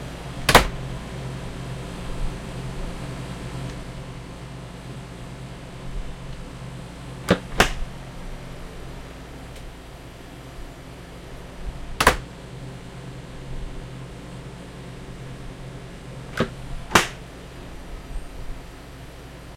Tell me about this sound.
freezer commercial walk-in open close from inside
close, commercial, freezer, from, inside, open, walk-in